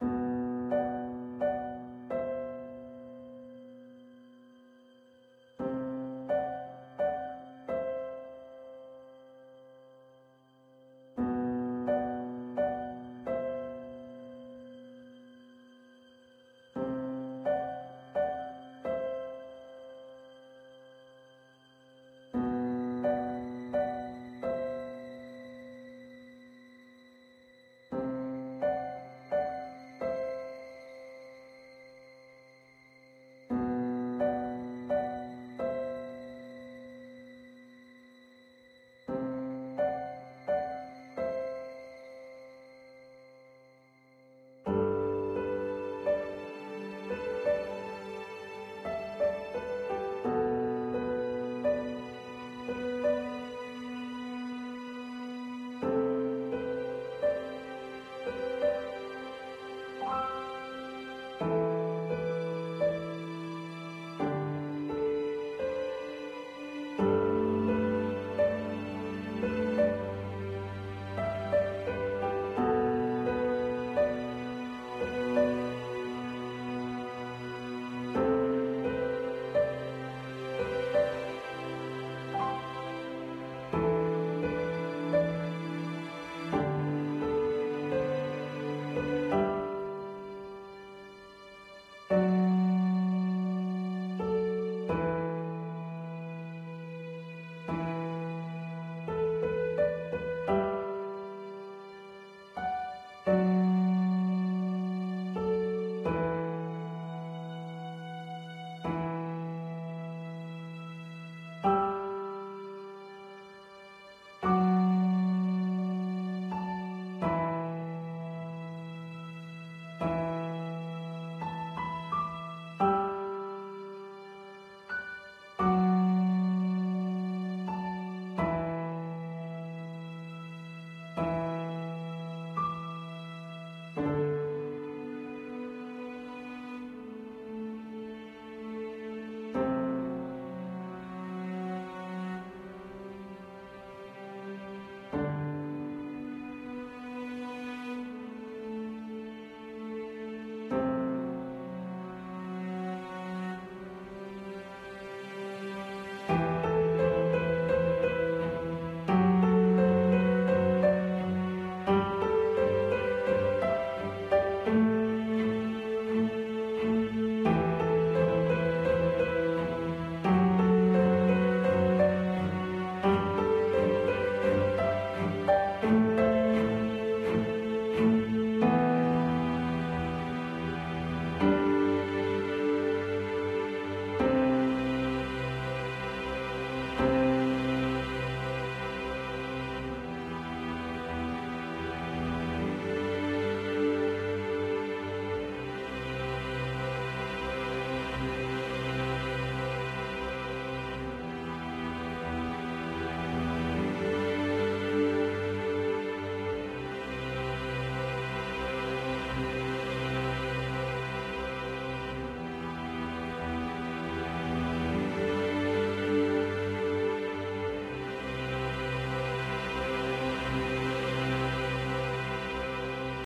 Emotional Piano Background Music
Genre: Emotional
Got rejected again well this one is too long as expected.
Background, Piano, String, Music